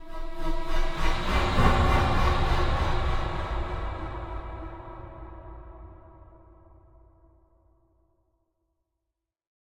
Eerie Metallic Sweep 001
A haunting metallic swoosh.